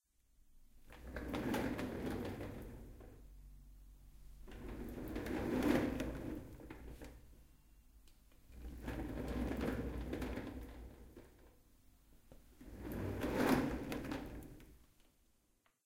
Office chair rolling